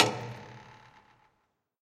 Recordings of different percussive sounds from abandoned small wave power plant. Tascam DR-100.
ambient, drum, field-recording, fx, hit, industrial, metal, percussion